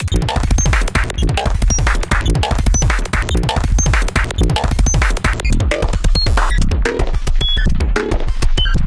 hello this is my TRACKER creation glitchcore break and rhythm sound
overcore, lo-fi, skrech, sci-fi, strange, soundeffect, electronic, sound-design, glitch, anarchy, weird, future, digital, loop, core, experymental, extremist